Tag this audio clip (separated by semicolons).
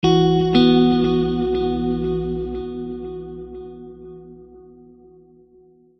ambient; chord; guitar